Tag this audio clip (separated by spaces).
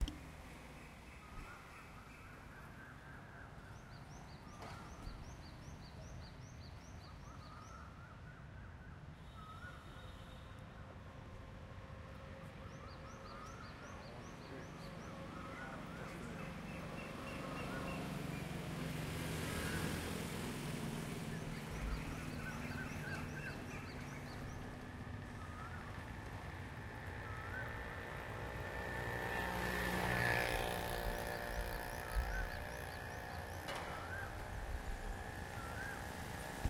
motorbike car street ambience nature field-recording atmosphere talk India